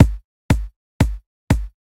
kick 120bpm01-16
120bpm, beat, drum, drum-loop, drums, kick, loop, quantized, rhythm